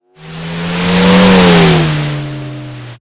Logotype, Race 01
logo, logotype, race, rush, car, speed, game, fast